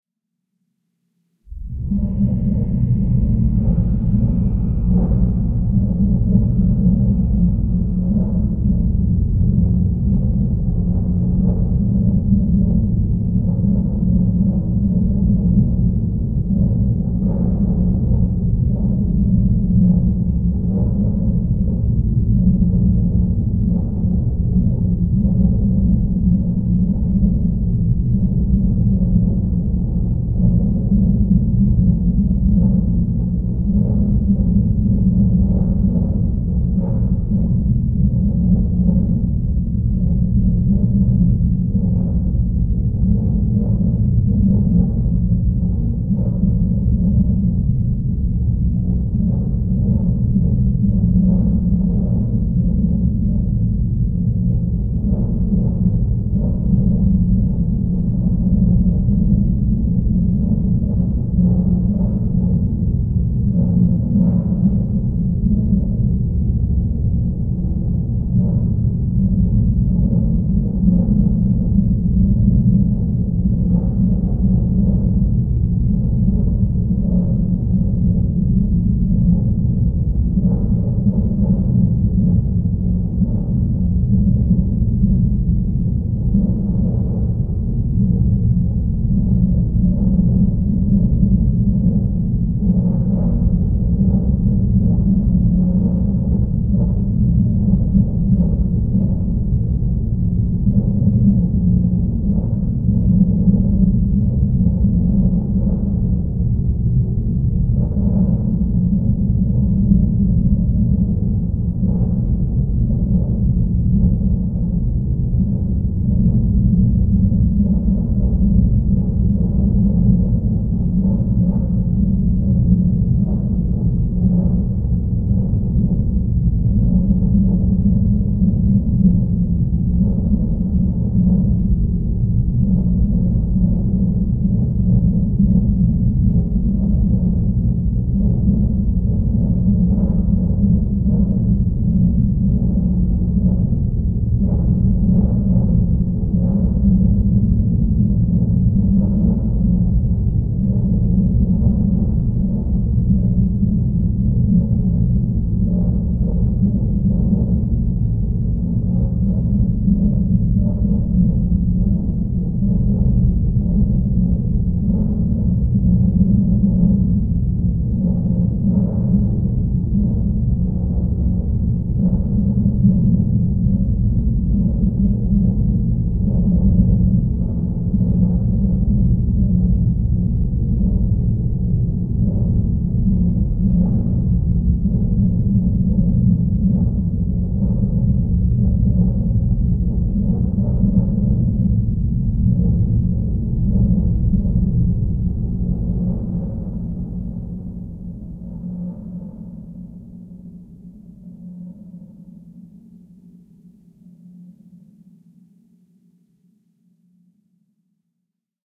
LAYERS 017 - MOTORCYCLE DOOM 2-03
LAYERS 017 - MOTORCYCLE DOOM 2 builds further on LAYERS 017 - MOTORCYCLE DOOM. It is this sound mixed with a self created pad sound from the Discovery Pro VST synth with a Detroit like sound but this sound is processed quite heavily afterwards: first mutilation is done with NI Spectral Delay, then some reverb was added (Nomad Blue Verb), and finally some deformation processing was applied form Quad Frohmage. To Spice everything even further some convolution from REVerence was added. The result is a heavy lightly distorted pad sound with a drone like background. Sampled on every key of the keyboard and over 3 minutes long for each sample, so no looping is needed. Please note that the sample numbering for this package starts at number 2 and goes on till 129.
evolving, experimental, soundscape, drone, artificial, multisample